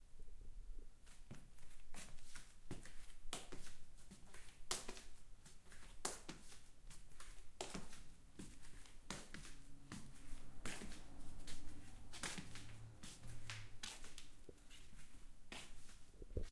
flipflop footsteps
Walking in flipflops on wood floor
floor, flip-flops, footsteps